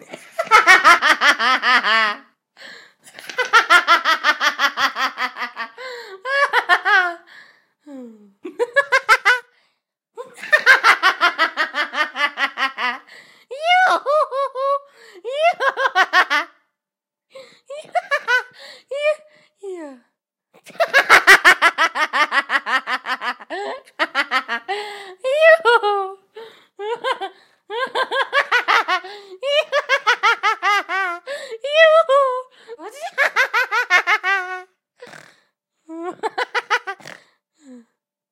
witch-laughing
Laughing of girl. I recorded it when I tickled her. Recorded with Zoom H1, processed (lowcut filter, hard limiting, expander).
cheerful, fairy-tale, female, girl, laugh, processed, voice, witch, woman